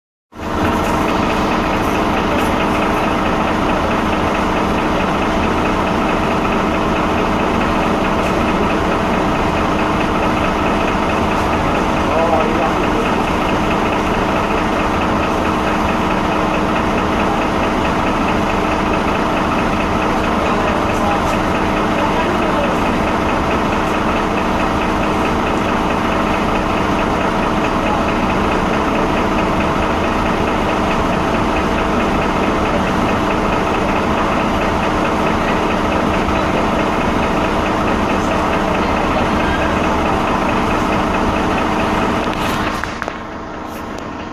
Bus noise
Noise coming from the bus in Rome
bus, noise, rome